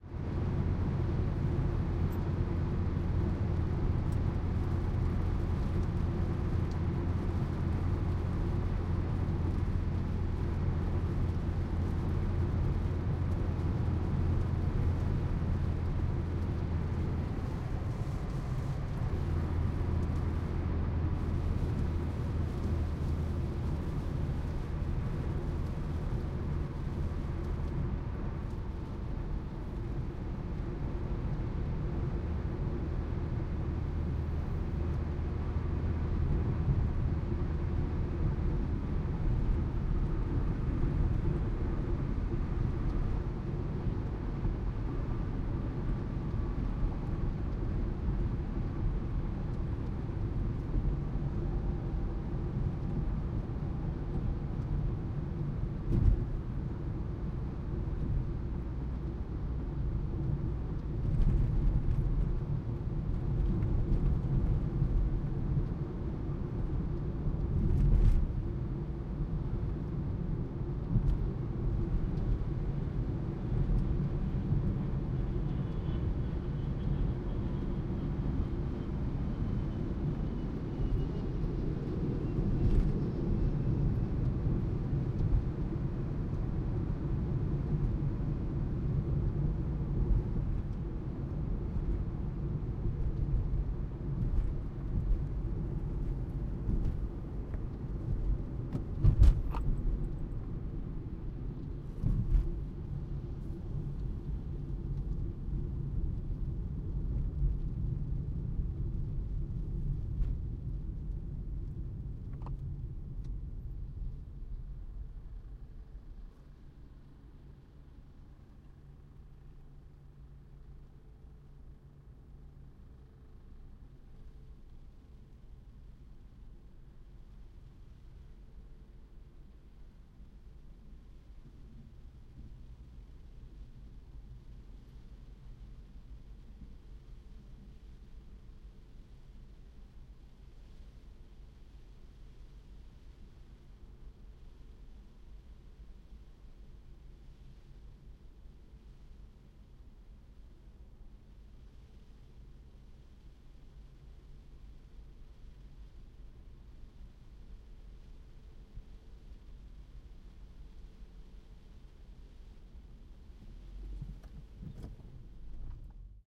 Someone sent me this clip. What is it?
HIGHWAY DRIVING exit idle IN LIGHT RAIN front
front pair of H2 in quad. Highway driving in light rain, exit with road thump(s), and then idle with light rain - no wipers. Toyota small car 100km down to 0. Windows shut but low level extraneous noise including plane passover.
automobile, car, drive, driving, engine, highway, idle, interior, light-rain, motor